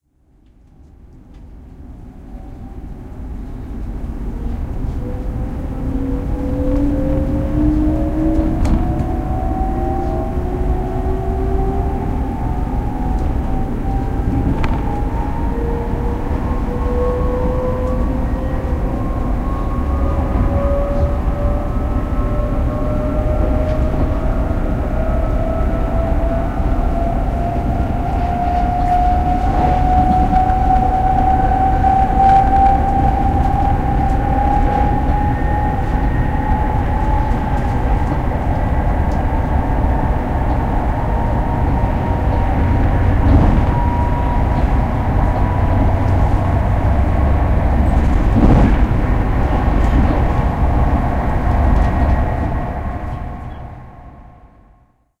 On my way home from studies in music I noticed quite nasty frequencies in every start from a station. You can hear the door beeing opened and closed to the noicy place between the cars. Recorded with the built in mic in a lap top.
accelerating, door-slam, inside, leaving, low-price-ticket, station, swedish, train, whining